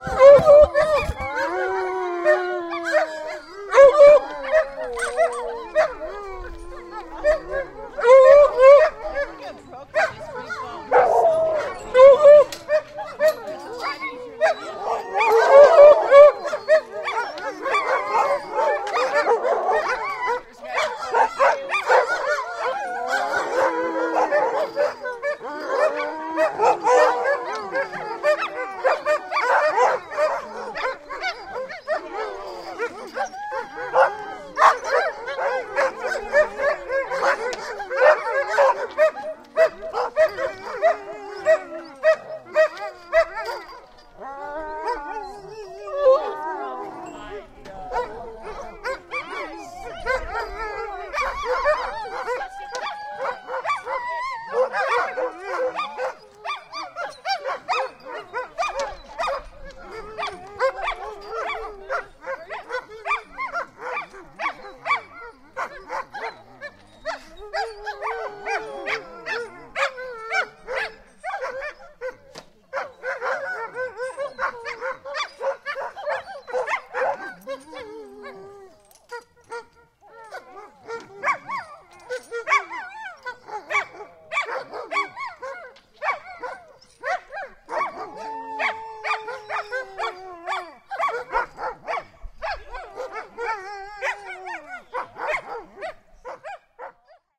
StormKloud Sled Dogs
Recorded on an early March morning at a ranch in the Rocky Mountains of Colorado with a Zoom H2 using the internal mics. A group of about twenty sled dogs greet a visitor enthusiastically. The higher barks and yips are the Siberian Huskies and the lower howls and moans are the Alaskan Malamutes. The dogs were excited and getting ready for a sled ride, their favorite activity (after eating).
wolf, field-recording, moan, malamute, husky, musher, bark, growl, howl, sled-dogs, Rocky-Mountains